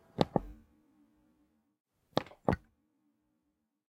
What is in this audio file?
PC, starting button variety
Starting button of PC, variety of sounds.